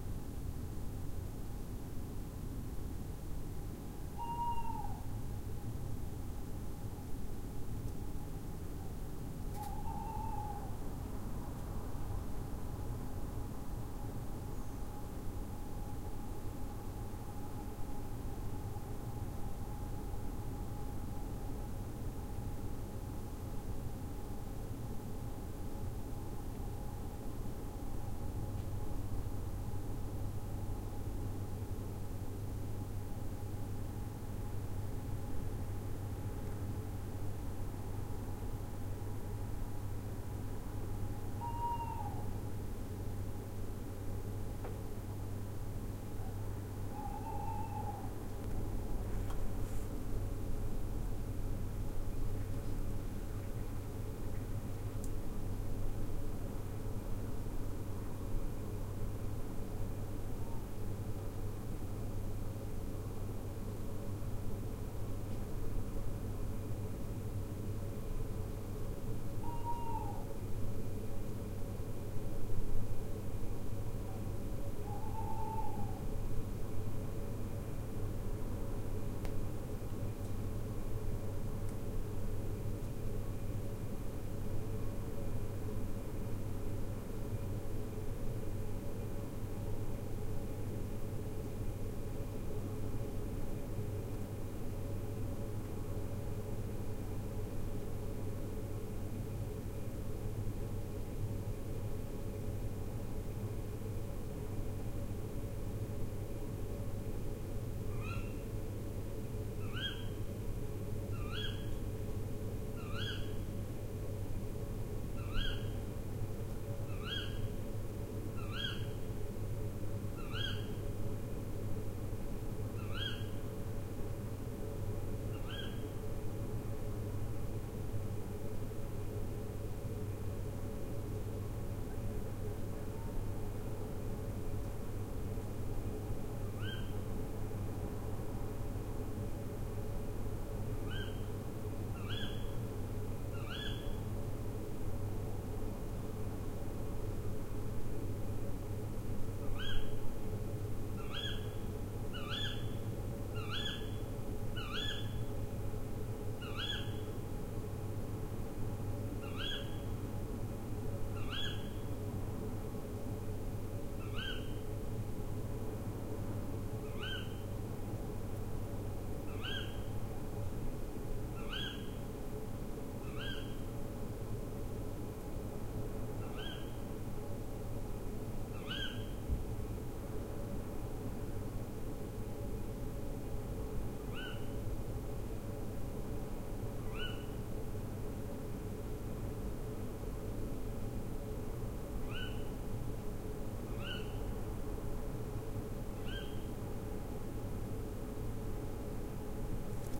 Owl hoots for a while, then does some screeching. Recorded early morning in the Surrey Hills.
night, hoot, screech, field, owl, recording, bird, field-recording, sing